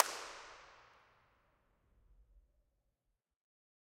These samples were all recorded at Third Avenue United Church in Saskatoon, Saskatchewan, Canada on Sunday 16th September 2007. The occasion was a live recording of the Saskatoon Childrens' Choir at which we performed a few experiments. All sources were recorded through a Millennia Media HV-3D preamp directly to an Alesis HD24 hard disk multitrack.Impulse Responses were captured of the sanctuary, which is a fantastic sounding space. For want of a better source five examples were recorded using single handclaps. The raw impulse responses are divided between close mics (two Neumann TLM103s in ORTF configuration) and ambient (a single AKG C426B in A/B mode pointed toward the roof in the rear of the sanctuary).